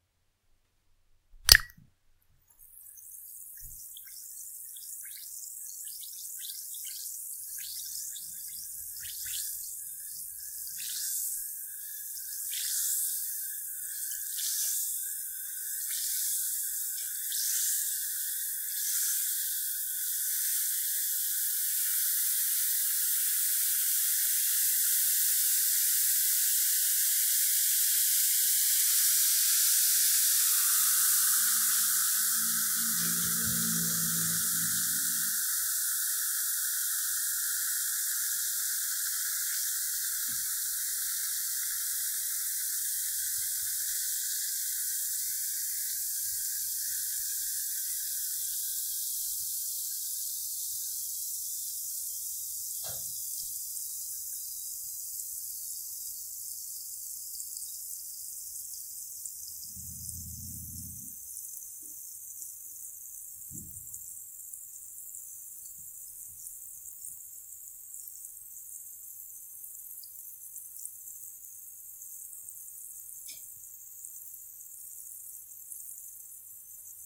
Sounds of an alka-seltzer (or other frizzing product) dropped in a glass of water and fizzling. Sound recorded with a Shure CM52 microphone and an iTrack Solo USB interface.